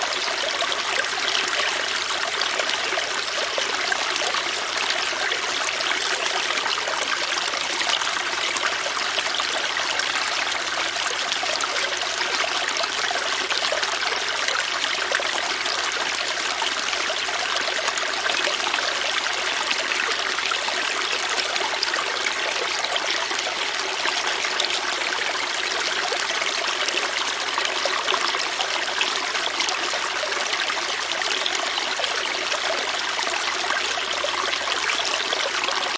Sound of a creek;
you can loop it